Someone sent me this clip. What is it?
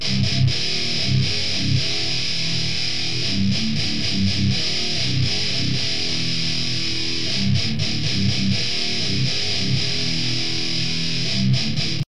groove loop 1
i think most of thease are 120 bpm not to sure
heavy, rythem, loops, hardcore, guitar, metal, rythum, rock, groove, thrash, 1